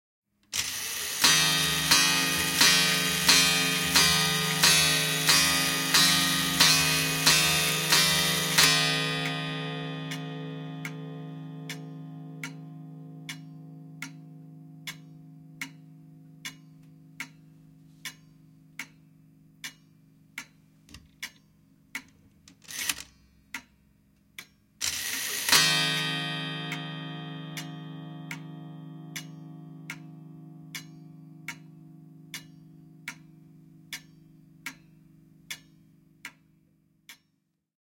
Seinäkello lyö 12, lyö puoli / Old clock from the 1800s on the wall strikes 12, fast single chimes, ticking, half strike (Schwartzwald)
Vanha kello 1800-luvun lopulta, lyö 12, nopea yksöislyönti, tikitysta, lyö puoli. (Schwartzwaldilainen).
Paikka/Place: Suomi / Finland / Nummela
Aika/Date: 23.05.1992
Yle, Suomi, Strike, Field-Recording, Finnish-Broadcasting-Company, Kello, Chime, Clock